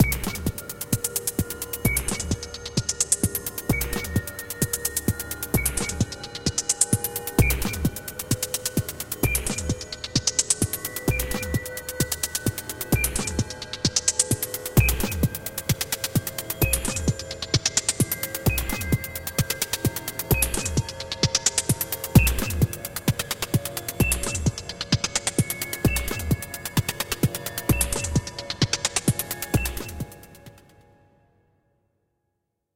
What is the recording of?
muvibeat3 130BPM
made with vst instruments